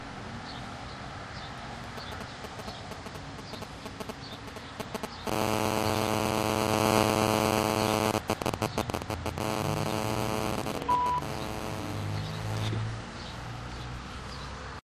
The cell phone radio interference blended with afternoon ambiance by the Garden State Parkway recorded with DS-40 and edited with Wavosaur.